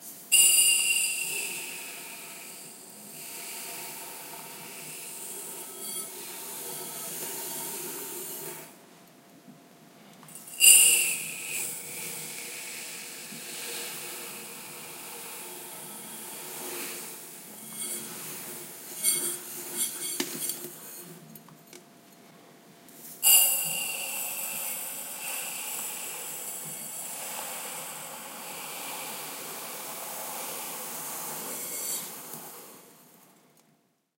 sample of pouring sugar from a cup into a bowl
ingredient, pouring, ingredients, subtle, cooking, bowl, kitchen, unprocessed, cup, sugar, sand